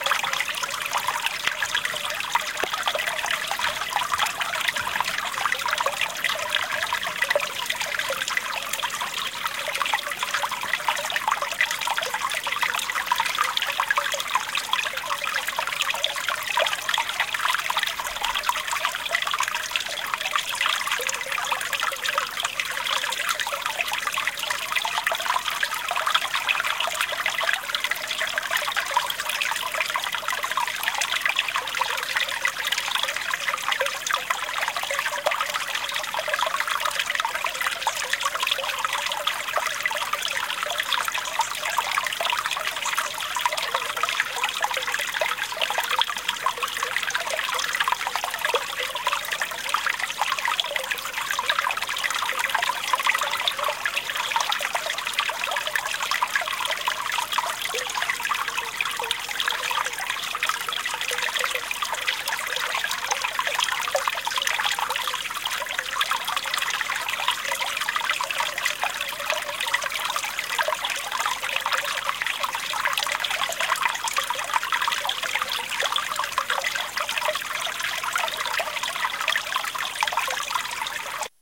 babble brook
A lovely walk through the woods behind Kennack Beach in Cornwall, following the stream, down to the beach, i stopped, in amongst the trees, to record it...
recorded on my Nikon D32oo camera using external Sony stereo mini microphone..